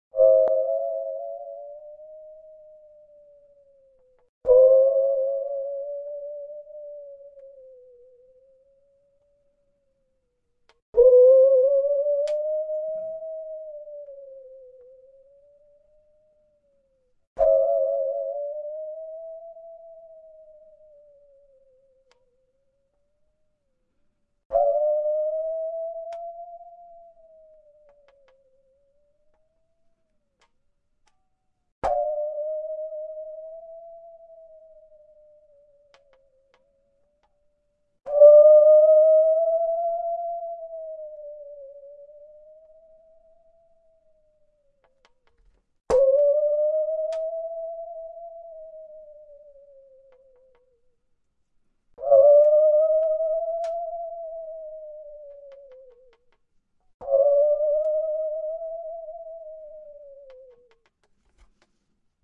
This DIY Halloween sound effects was created with 22 inch hand saw, wooden control handle and rubber mallet and recorded on my desktop with Zoom H4 and some editing of the attack to decrease the hit impact, and a little detune for effect.